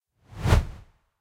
VS Short Whoosh 6
Short Transition Whoosh. Made in Ableton Live 10, sampler with doppler effect.